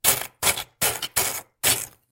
Freezer Ice Stab Knife 05
Stabbing at freezer ice with a knife
kitchen, household, scrape, stab, knife, ice, freezer